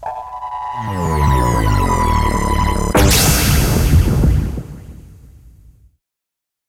HITS & DRONES 25
broadcasting, Fx, Sound